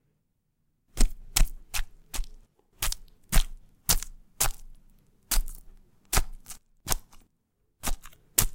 STABBING STAB KNIFE
Sound of stab
PUNALADA, STAB, FRUTA, STABBING, FRUIT, APUNALAR, CUCHILLO, CUCHILLADA, KNIFE